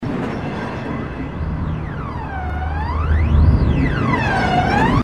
sound-design created from processing a field recording of a train
passing by on an overhead track; recorded in Montreal; processed with
Adobe Audition
processed, sound-design, passing, field-recording, train